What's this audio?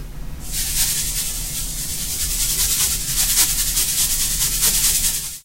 Shaking a pompom in front of a cheap Radio Shack clipon condenser.